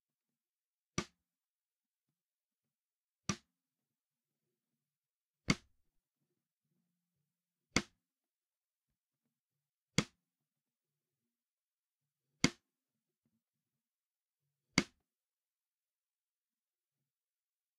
Raw recording of a Risen Custom 6 x 14 maple wood snare